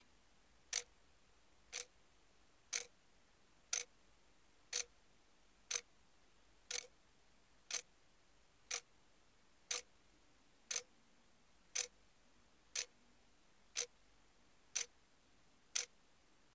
A clock ticking sound.
time, ticking, minute, ticks, seconds, Clock